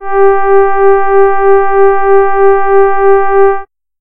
Warm Horn G4
An analog synth horn with a warm, friendly feel to it. This is the note G in the 4th octave. (Created with AudioSauna.)
synth, warm, horn, brass